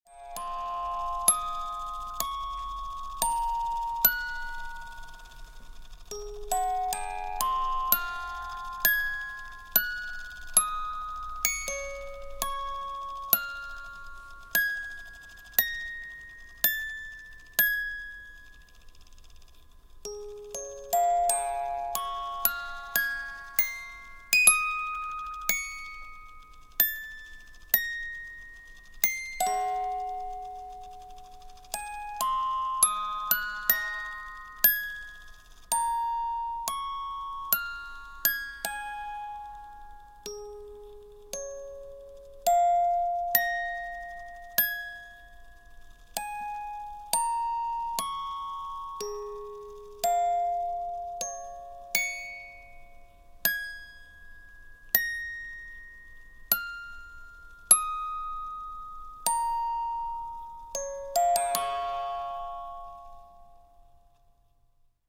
Just before I could make a proper recording this music box broke down. Now it plays in slow motion, resulting in a cinematic effect.